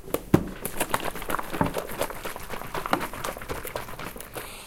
mySounds EBG Amelia
Sounds from objects that are beloved to the participant pupils at the Primary School of Gualtar, Portugal. The source of the sounds has to be guessed.
Escola-Basica-Gualtar, Portugal